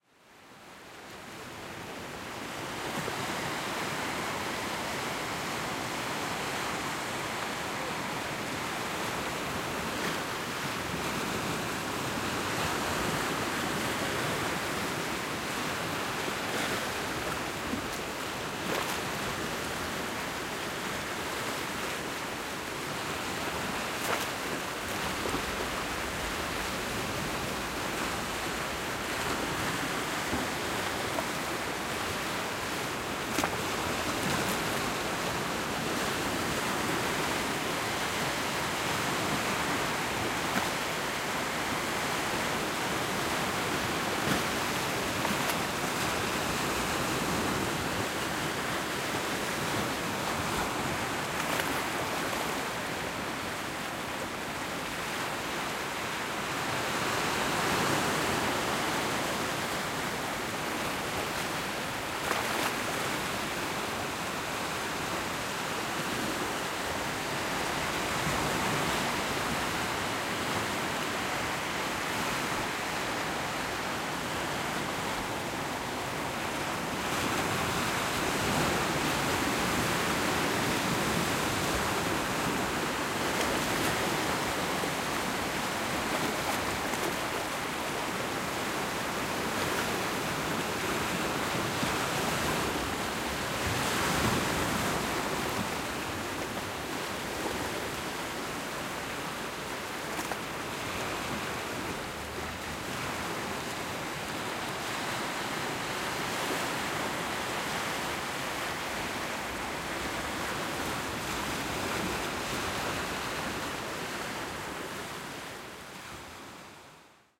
The sound of ocean waves breaking through rocks on the beach. Recorded in Caloundra using the Zoom H6 XY module.